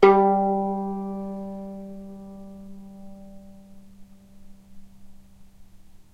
violin pizz vib G2
violin pizzicato vibrato
pizzicato, vibrato